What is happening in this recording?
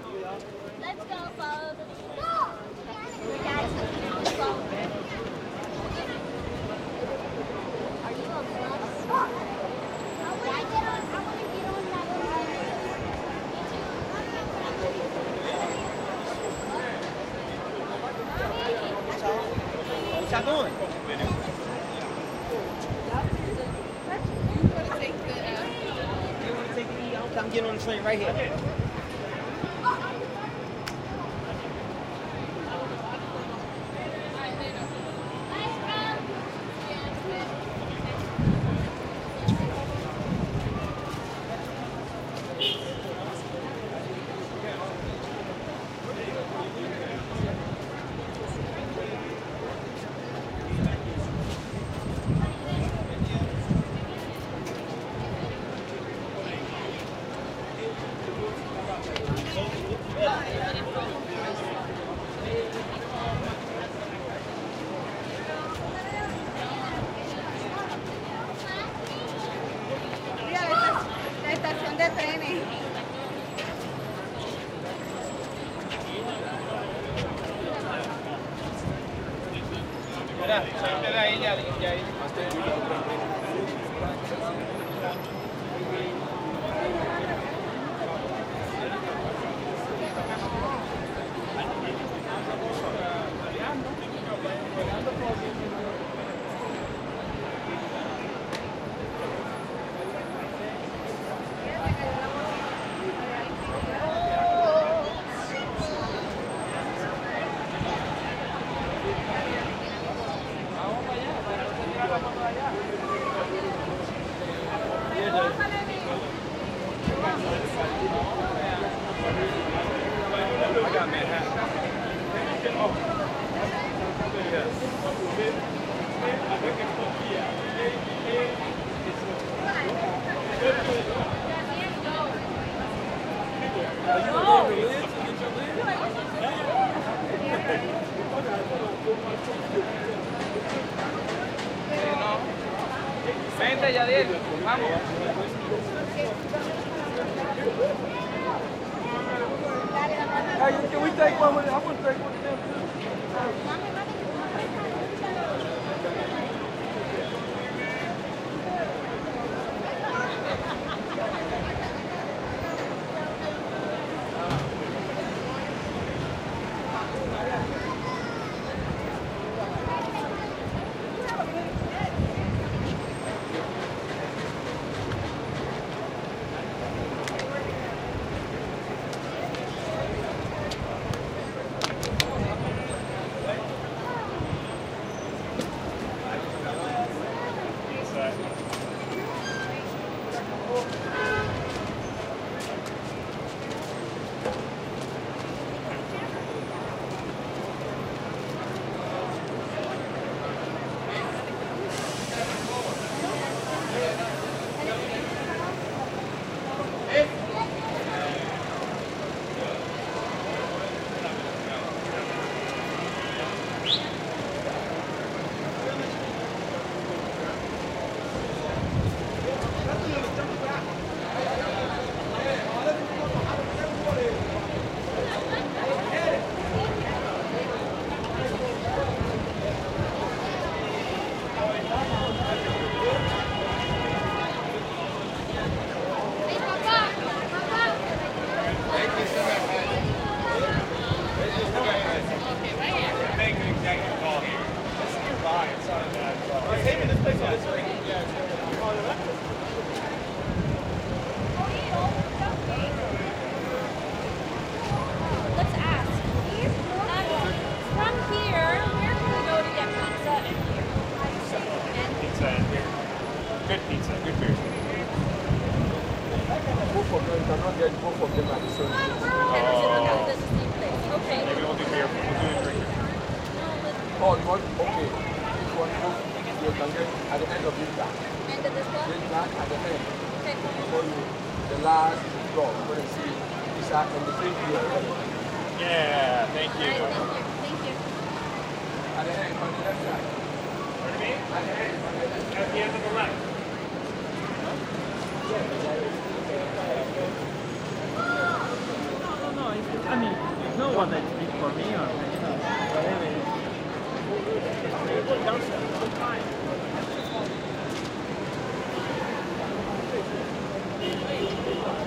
Audio recorded on the NW corner of 47th street and 7th avenue